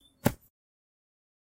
Footstep on grass recorded with Zoom Recorder